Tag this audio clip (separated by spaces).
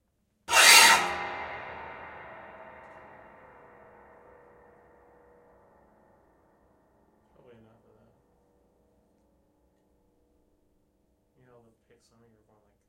industrial effect horror fx acoustic piano sound sound-effect soundboard